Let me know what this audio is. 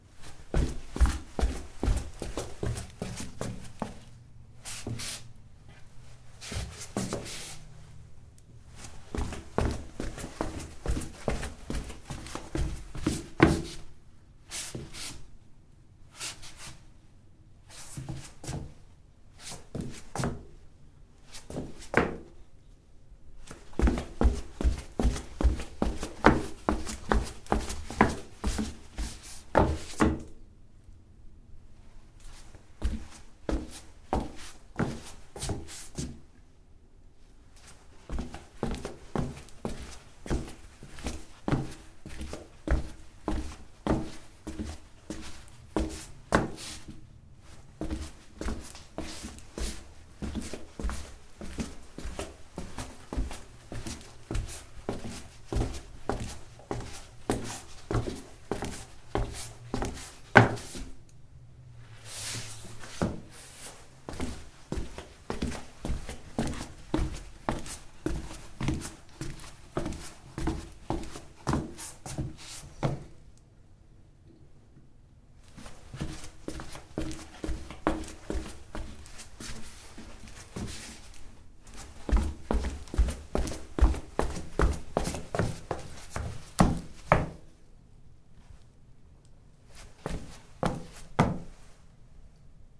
sfx flipflops auf parkett 01
Walking with flipflops on wooden floor
running, walking, steps